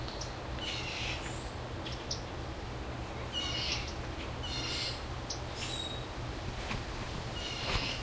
Ambient snippet recorded at Busch Wildlife Sanctuary with Olympus DS-40.
nature, field-recording, ambient